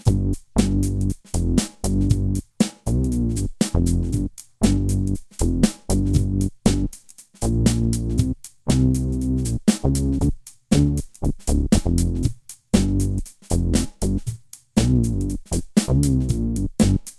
short loop, drums and bass. Same as Stevie run #2, with just drums and one bass